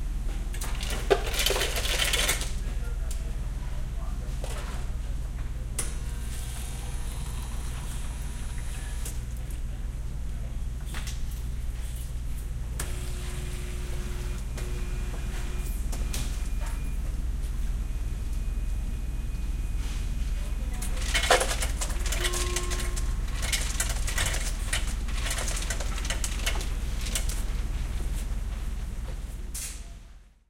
I walk up to, and stop beside someone using a drink dispenser. Said person first uses the ice machine, then requests a drink from the machine. You can clearly hear the electrics at work as the dispenser goes to work filling the cup with a drink.
Another person dispenses ice after the last one.
beep, beeping, buzz, buzzer, buzzing, cup, dispense, dispenser, drink, fast-food, field-recording, fill, food, ice, ice-machine, liquid, motor, restaurant, water